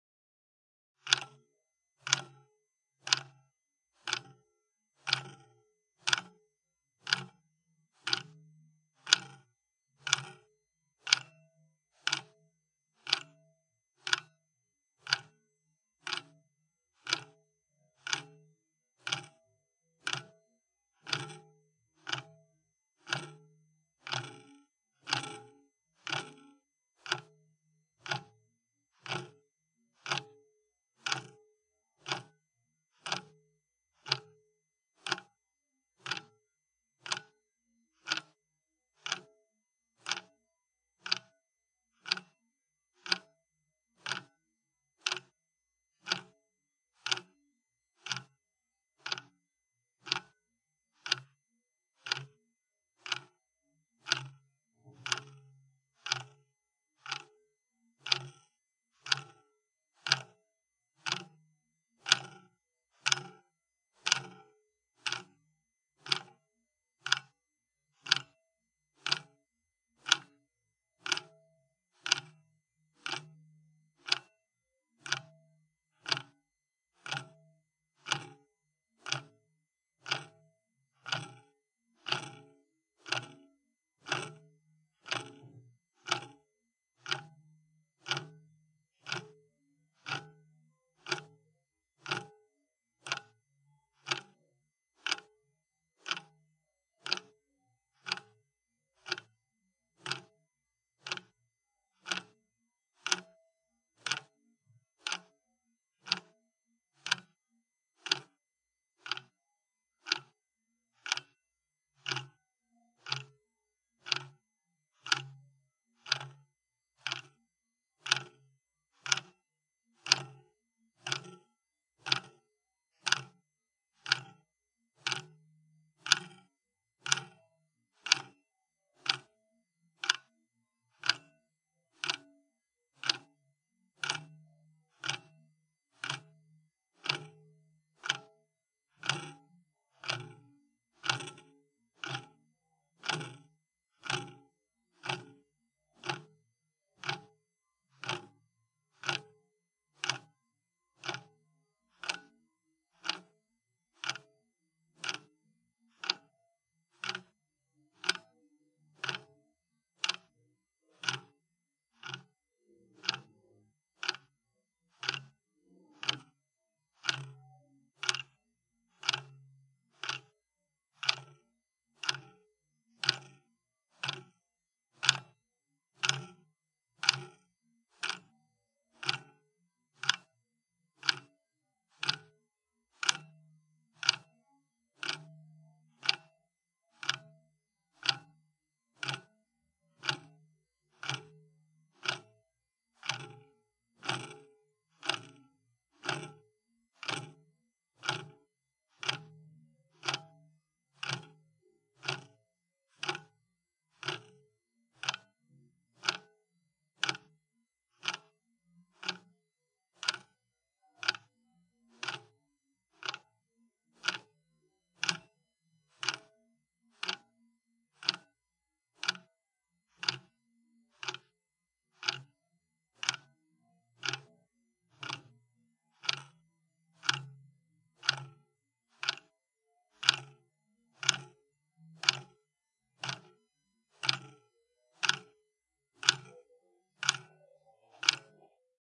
This is a plastic wall clock recording close to the clock mechanism on the rear of the clock. Post EQ and some minor noise reduction have been applied.